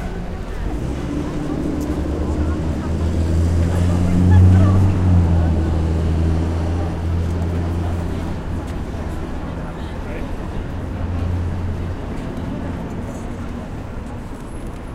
Sidewalk Noise with Passing Lowrider
by, car, city, drive-by, field-recording, lowrider, new-york, nyc, passing, public